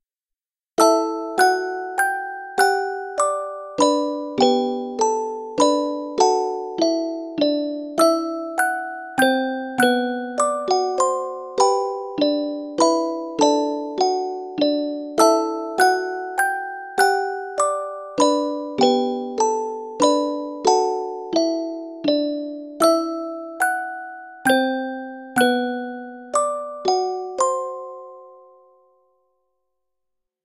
musicbox clocri 080320
Musicbox sound sample.Based melody is composed by my band and it's original.The latter part will slow down and stop at halfway of tune.
slowdown, musicbox